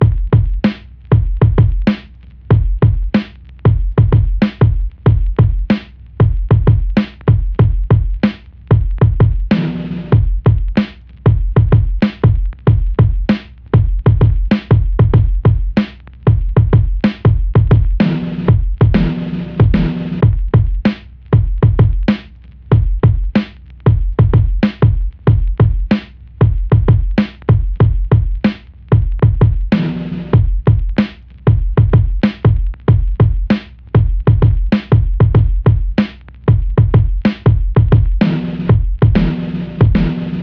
Boom Bap Hiphop Kick Snare Loop 95 BPM
Drum, pack, school, Hiphop, Lofi, Beat, loops, music, sample, Snare, Boom, rap, Loop, old, 95, samples, BPM, Kick, Bap